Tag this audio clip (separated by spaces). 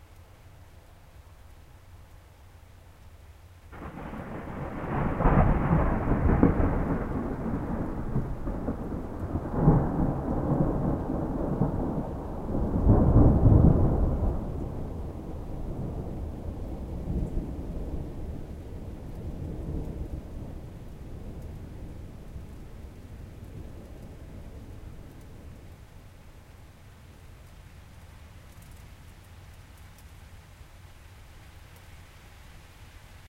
lightning field-recording thunderstorm storm thunder rain